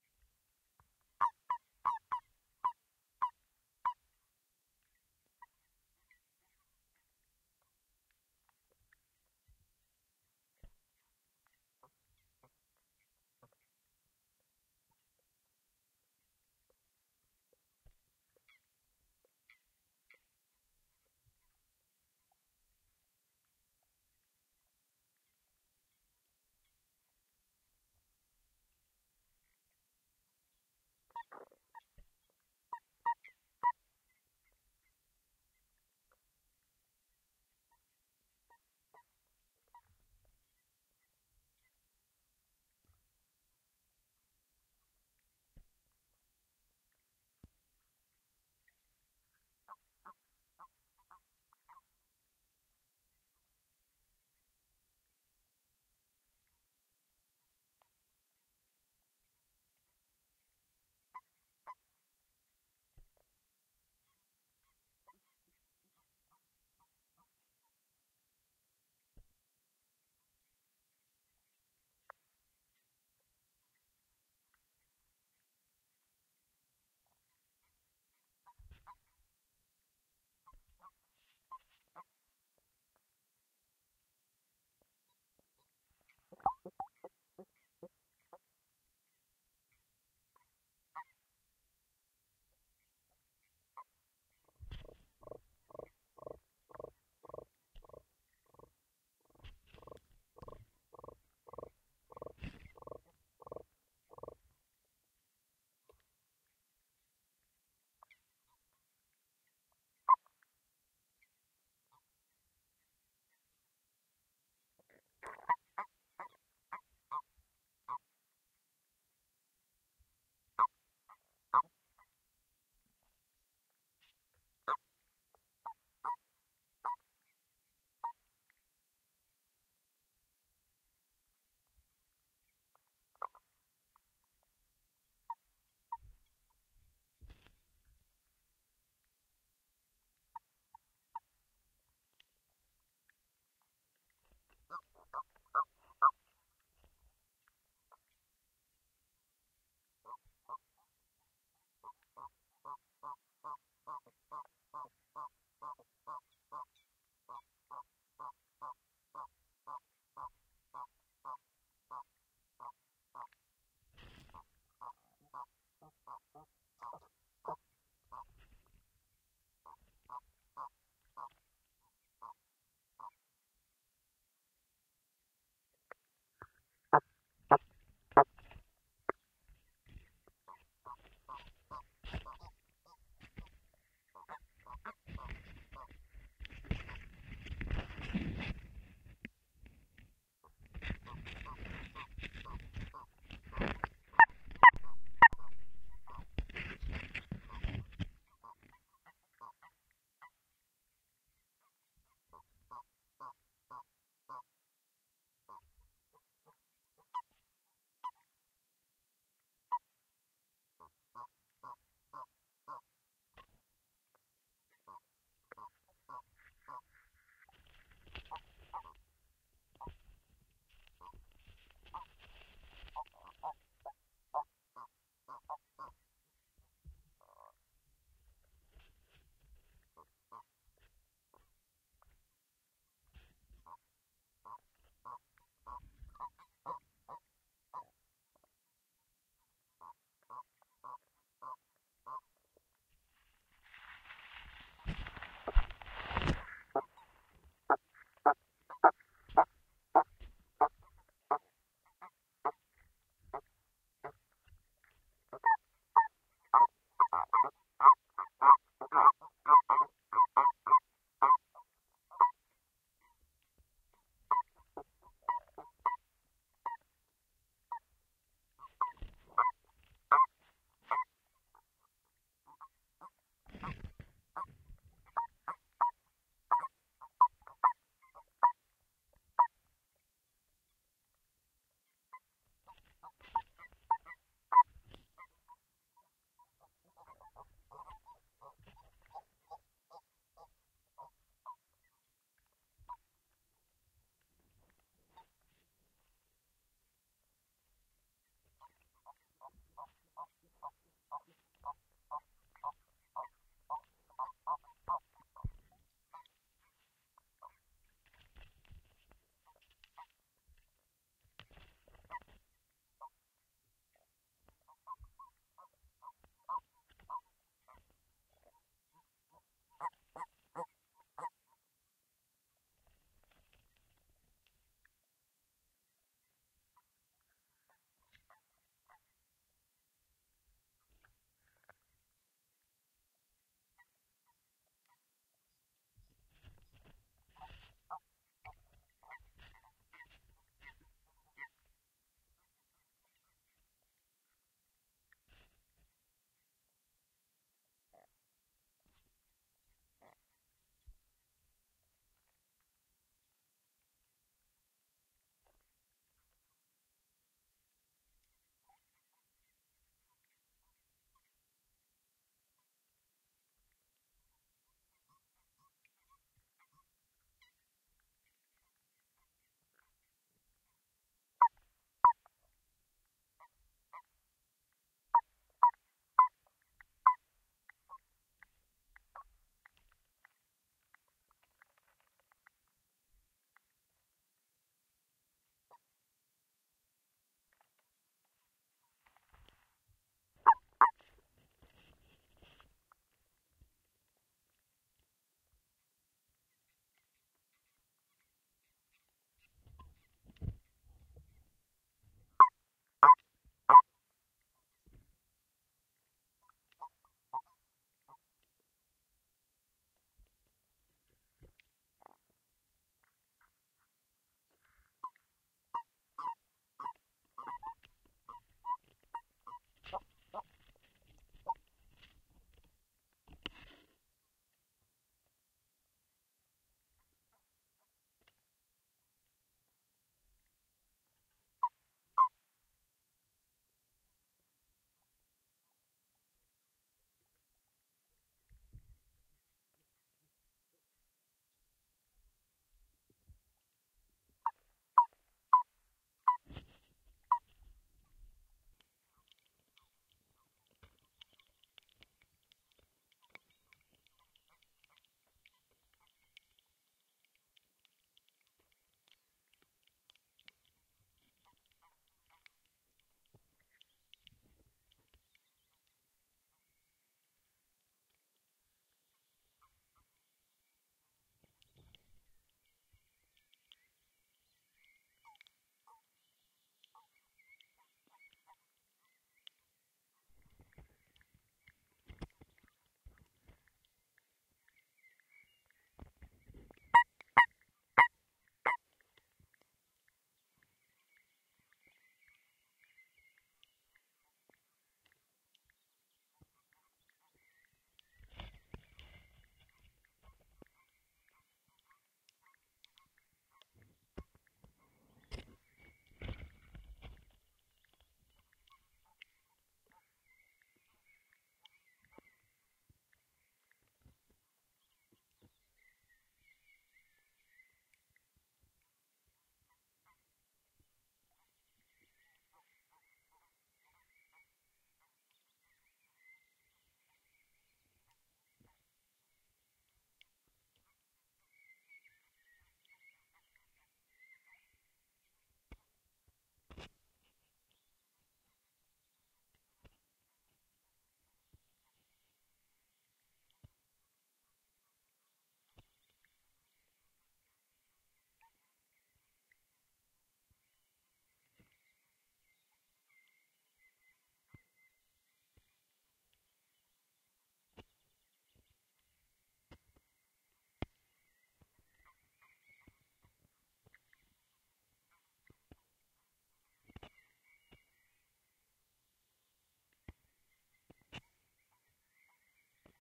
Mating season in this pond full of frogs. Hydrophones were about 30cm deep into the water, near the shore. In the last two minutes you can notice birds in the background. Croaking and squeaking. Some gain and cleanup was made in iZotope RX.
Stereo recording made with JrF d-series hydrophones into a Tascam DR-100mkiii thru Hosa MIT-129 Hi-Z adapters.